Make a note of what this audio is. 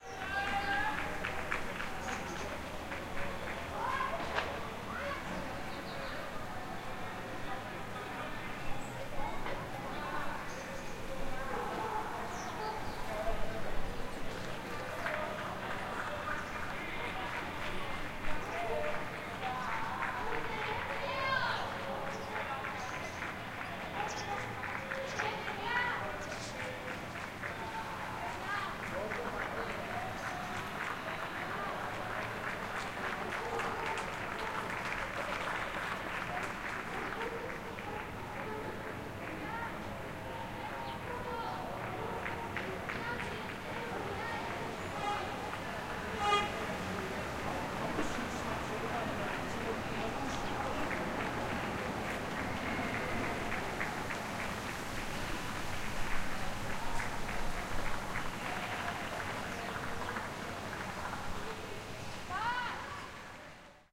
Marathon Winter 2017 -Relaxed State
People running the marathon. Other people cheering them up via clapping, children shouting, birds chirping, greek music to cheer the runners. It's a mix of all these sounds. Recorded via ZOOM H6, XY120
marathon, cheering, people